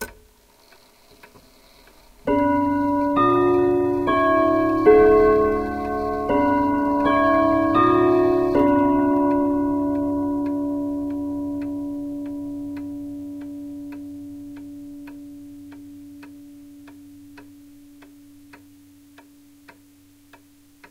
I put my recorder in a grandfather clock and waited the half-hour chime. Sorry, I don't have more sound recordings about hours.
Recorded with Tascam DR-100MKII
Use my files wherever you want and however you want, commercial or not. However, if you want to mention me in your creations, don't hesitate. I will be very happy ! I would also be delighted to hear what you did with my recordings. Thanks !